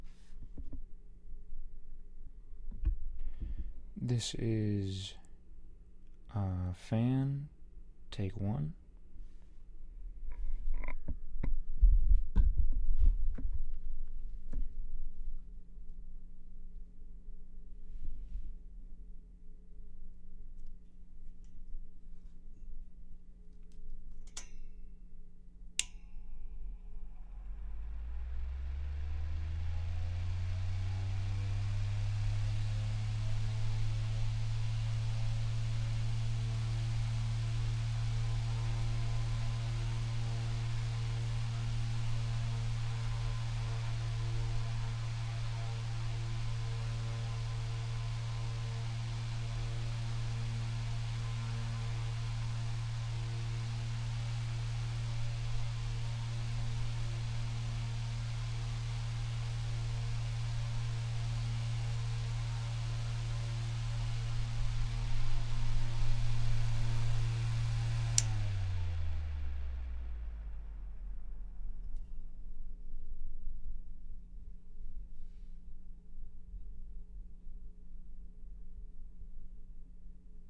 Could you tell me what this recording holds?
An electric fan for MSU 254. First Project
sound, electric, fan